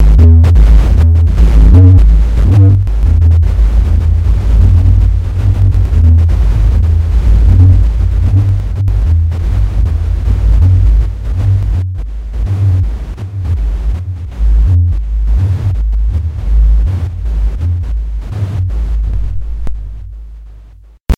Static noise recorded from a xlr jack.Processed mainly in Cubase on an HP pc.Location: Keflavík, Iceland.